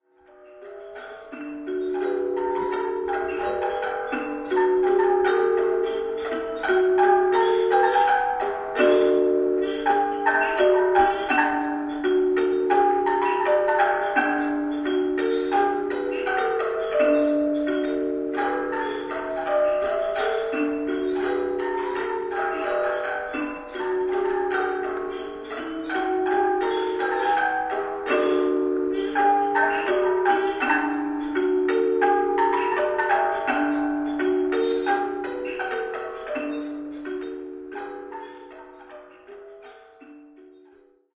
Slow music box
horizontal-localization, sound-localization, out-of-head-localization, dummy-head, front-back-localization, test, 3D, binaural, localization, binaural-imaging, headphones